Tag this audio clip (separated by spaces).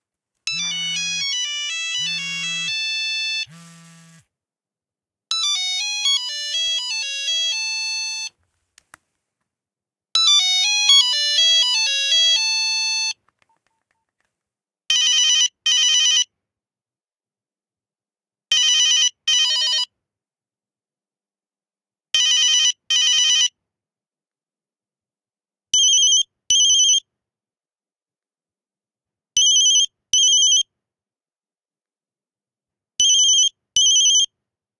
8210 cellphone mobile mobilephone nokia ringtone